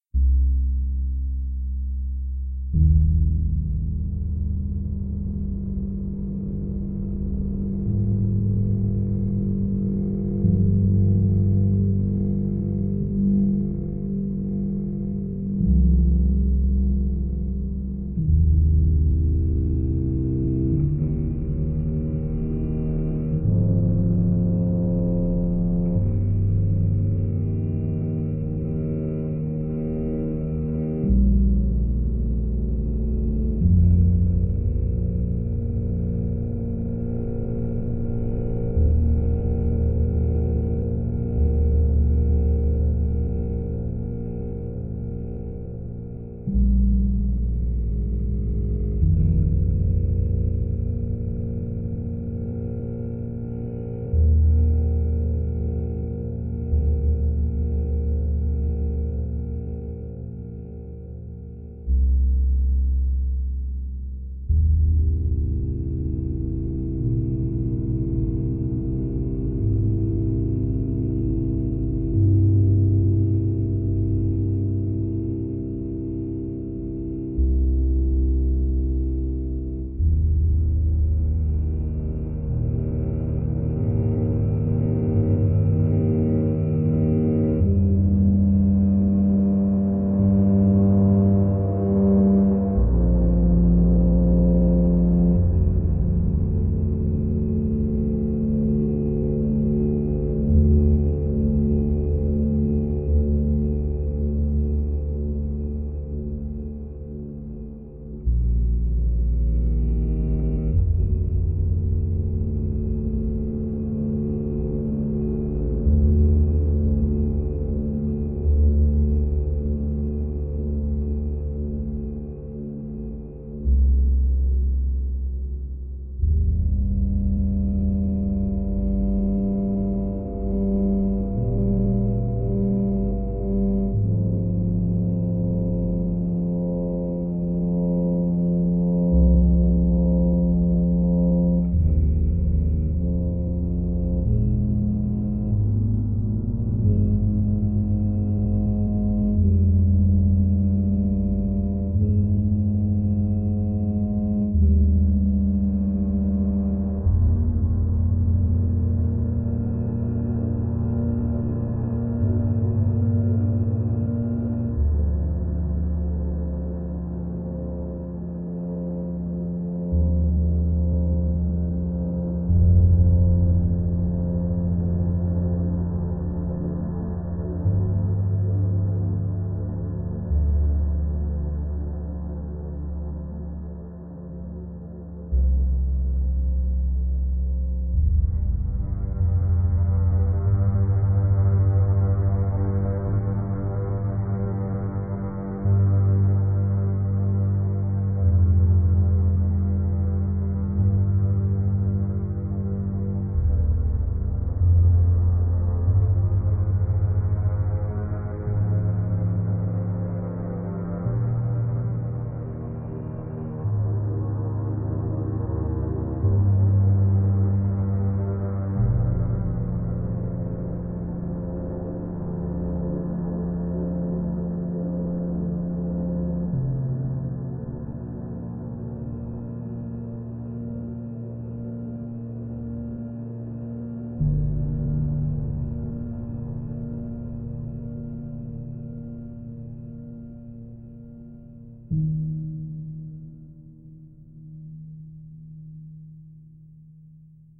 a space ambient sound forwards
Effect, Fi, Game, Sci, Sound